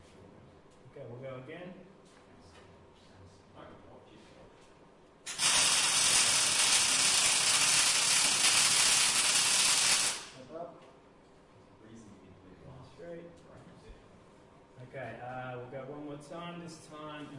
industrial welding long4
long duration mig weld
mig-weld, weld, arc-weld, medium, welding